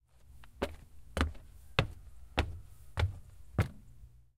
footsteps - wood stairs 02
Walking up wooden stairs with the microphone held to my feet.